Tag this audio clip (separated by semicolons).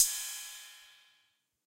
cy; cymbal; roland; tr